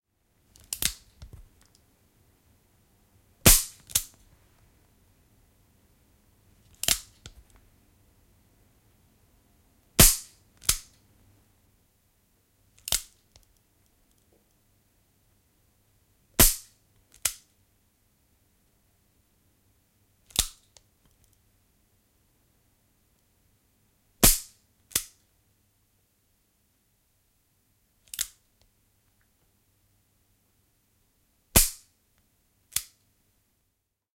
Revolveri, tyhjä, laukaus / Empty gun, revolver, shots, cocking, striker, interior
Tyhjä ase, revolveri, viritys ja laukaus, useita. Sisä.
Paikka/Place: Suomi / Finland / Rajamäki
Aika/Date: 08.12.1984
Ase, Liipaisin, Finnish-Broadcasting-Company, Suomi, Revolver, Yle, Yleisradio, Tehosteet, Empty-gun, Finland, Viritys, Soundfx, Gun, Revolveri, Iskuri, Pistooli